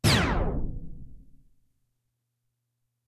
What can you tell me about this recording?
tape slow5
Pieces to create a tape slowdown effect. Recommend combining them with each other and with a record scratch to get the flavor you want. Several varieties exist covering different start and stop pitches, as well as porta time. Porta time is a smooth change in frequency between two notes that sounds like a slide. These all go down in frequency.
252basics, halt, porta, roland, screech, slow, stop, tape, xp-10